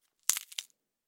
Bone Break 92
gore, bones, crack, snapping, horror, vegetable, breaking, crunch, kill, sound-design, bone, snap, neck, break, fight, flesh, punch